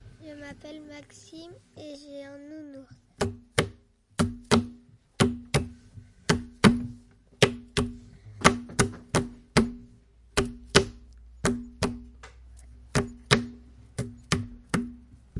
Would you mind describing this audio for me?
mysounds-Maxime-peluche
france, mysounds, saint-guinoux